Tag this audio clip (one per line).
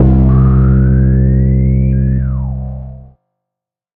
110
808
909
acid
bass
beat
bounce
bpm
club
dance
dub-step
effect
electro
electronic
glitch
glitch-hop
hardcore
house
noise
porn-core
processed
rave
resonance
sound
sub
synth
synthesizer
techno
trance